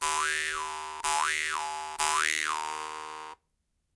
Sounds captured during some interaction between me and a mouthharp I bought in Vietnam (Sapa). Marantz PMD670 with AT825. No processing done.
mouthharp, harp, metal, metallic